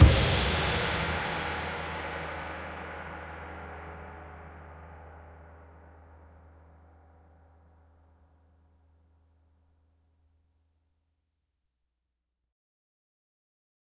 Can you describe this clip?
China End
This is a single gong hit meant to be used in conjunction with my Chinese percussion sample "China_Loop." Enjoy!
Loop, Chinese, Asia, Drums, Percussion, Eastern, China, Gong